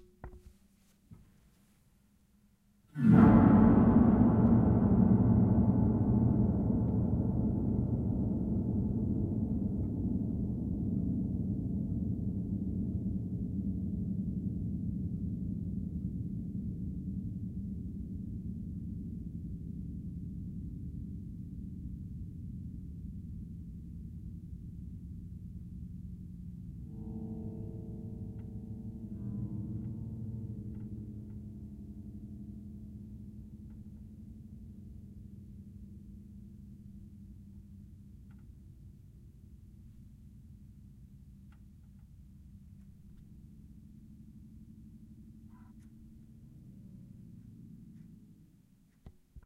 piano sfx
Pressed sustain pedal and played on piano's strings inside of it.
Might be used as a horror sound.